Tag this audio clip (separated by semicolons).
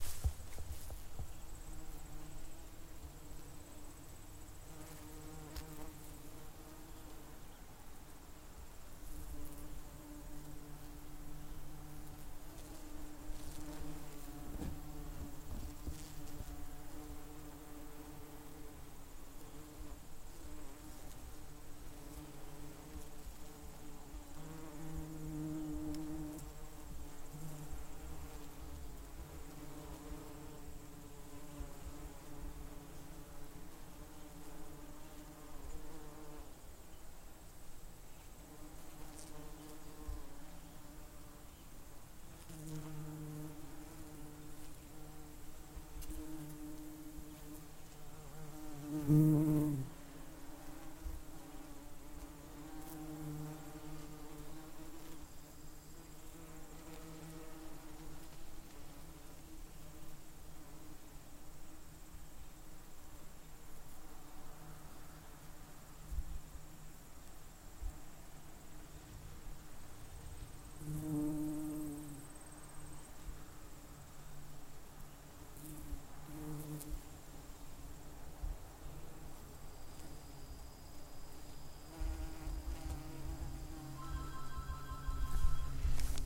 bugs; Bees; insects